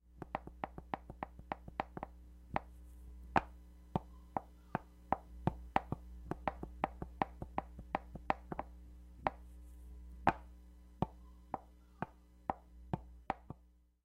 Pasos Bailarina
Ballerina in point shoes